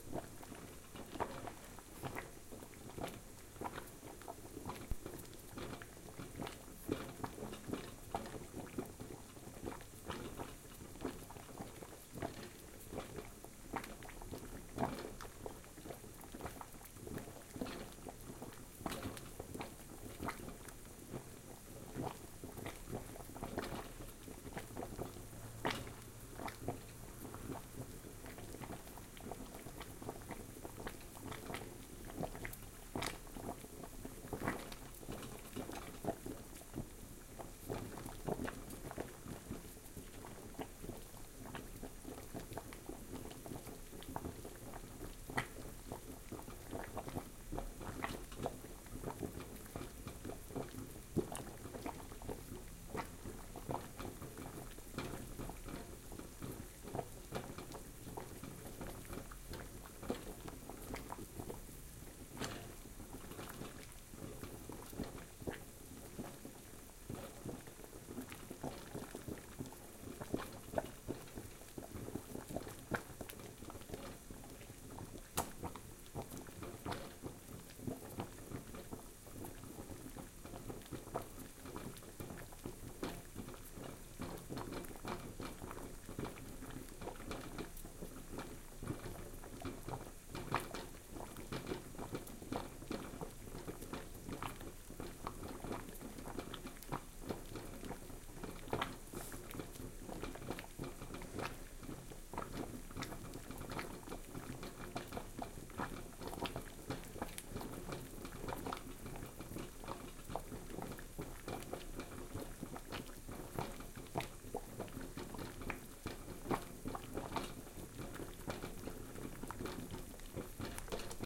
Boiling Water
Boiling a really tasty pumpking
boiling, boiling-water, bubble, bubbles, bubbling, bubbly, gurgle, liquid, water